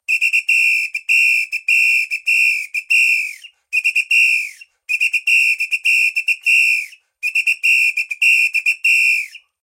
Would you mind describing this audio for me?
Different rhythmic patterns made by a samba whistle. Vivanco EM35, Marantz PMD 671, low frequences filtered.